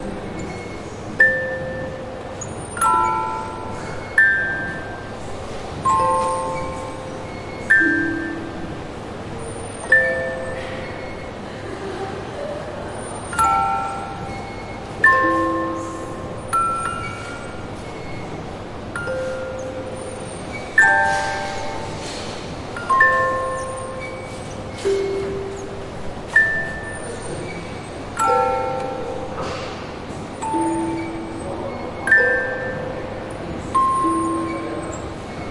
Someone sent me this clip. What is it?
cropped ste-135 1